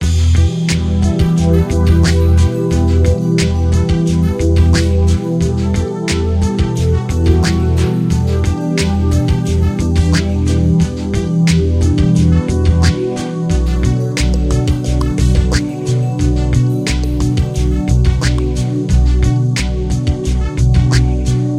Loop DreamWorld TheCircus 03
A music loop to be used in storydriven and reflective games with puzzle and philosophical elements.
Philosophical, game, videogame, music-loop, Puzzle, indiedev, gamedev, video-game, gamedeveloping, games, Thoughtful, videogames, indiegamedev, gaming, sfx, music, loop